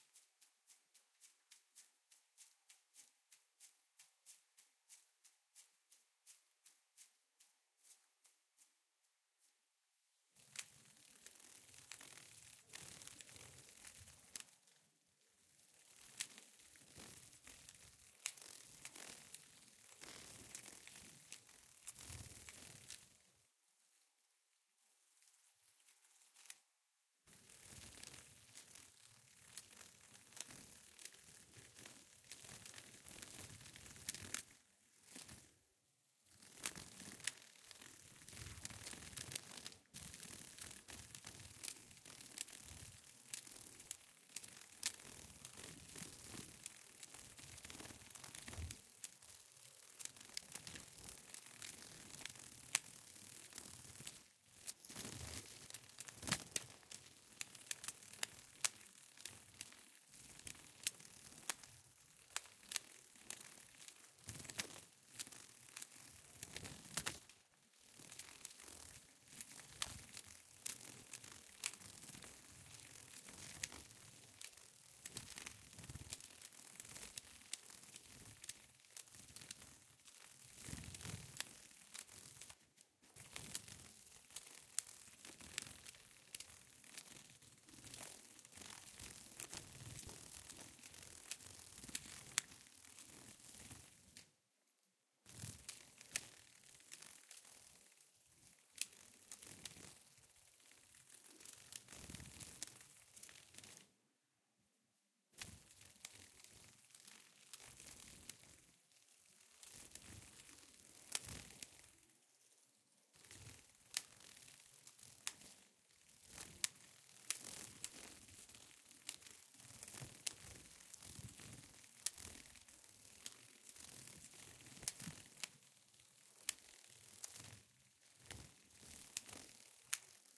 butterfly wings
a nice looking peacock butterfly, with orange wings, flapping around a Sony PCM M10 recorder.